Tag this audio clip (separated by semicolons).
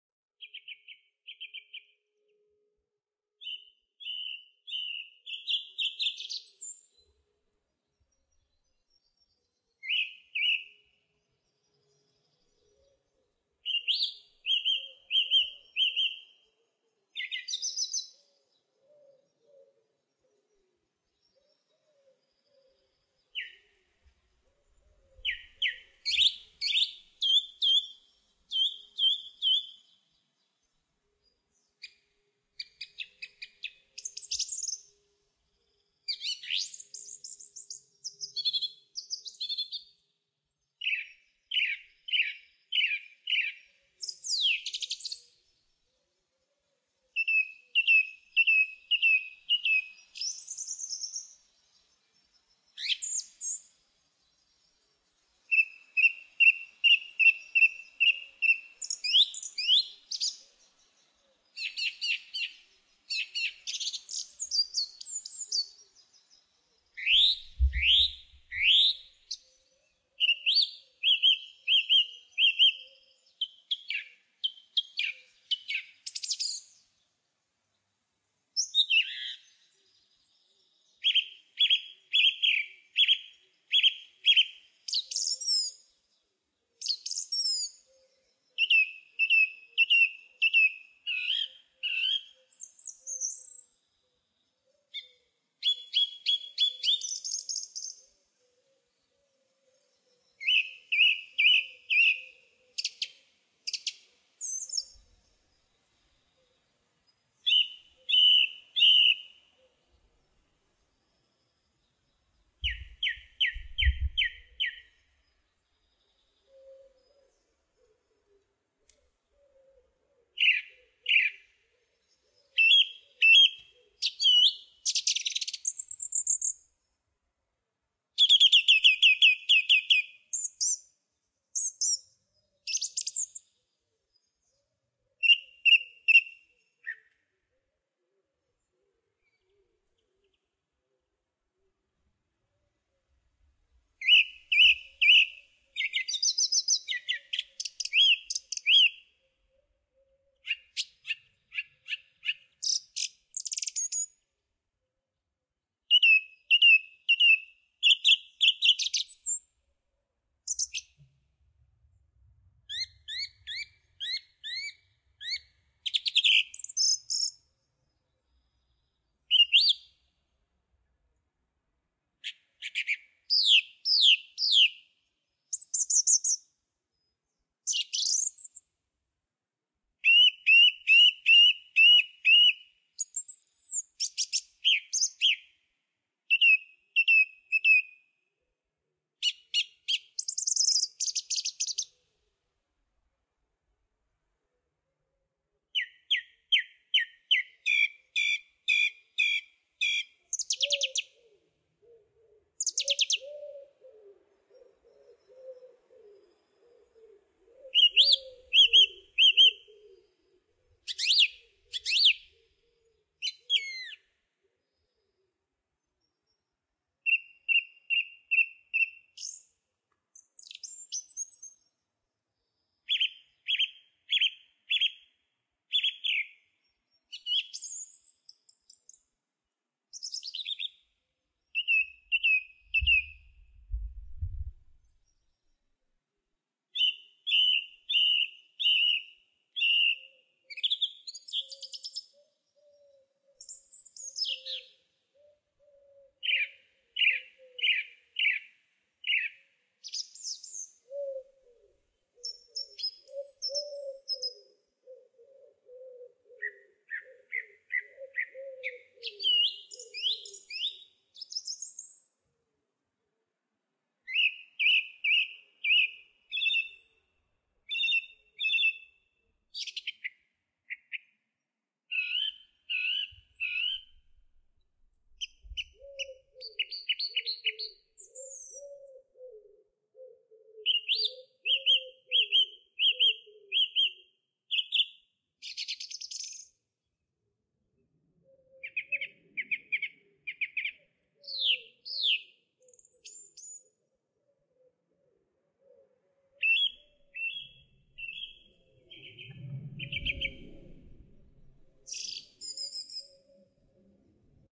bird; field-recording; bird-song; song-thrush